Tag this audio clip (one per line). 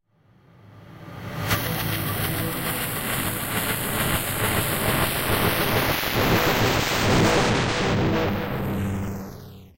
delay
distortion
echo
effect
fx
noise
rhytmic